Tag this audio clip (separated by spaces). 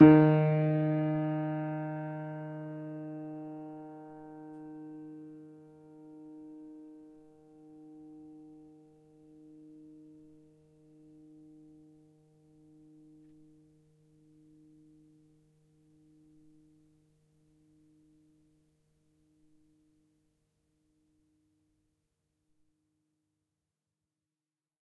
choiseul multisample piano upright